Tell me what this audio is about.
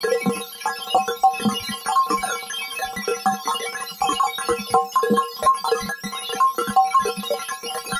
digital water drops
Josh Goulding, Experimental sound effects from melbourne australia.